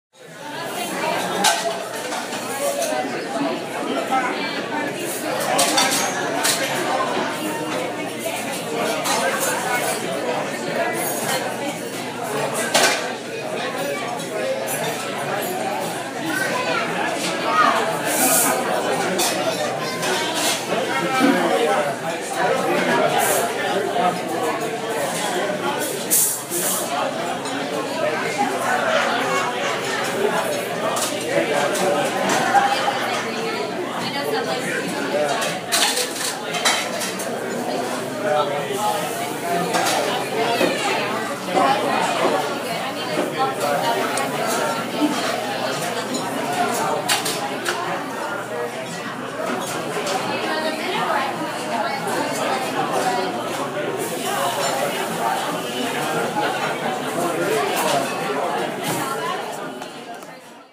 crowd restaurant ambience